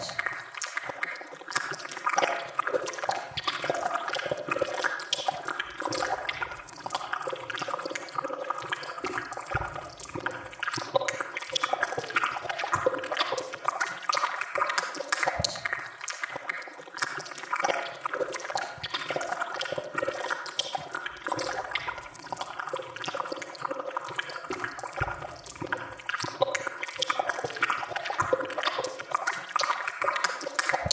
squishy flesh thingy seamless
a seamless, loopable, squishy, fleshy, gross sound
gore, stirring, flesh, loopable, slime, wet, gurgle, squishy, gross, sticky, seamless, loop, squish, squelch